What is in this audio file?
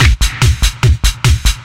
TECHNO LOOP SPEEDY J STYLEE
loop, techno